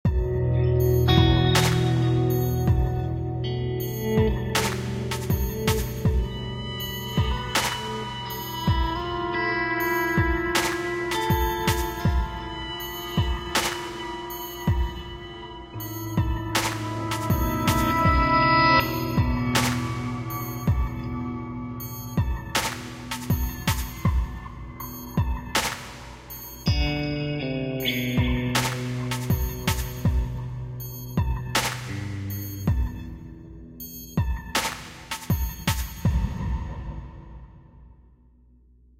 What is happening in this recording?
loop meditations
Could be used as a loop, guitar and a quick drum program with+FX
ambient, dark, drums, guitar, loop